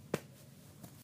Tap On Shoulder 2
close, closed, closing, door, hit, metallic, open, percussive, shoulder, wood, wooden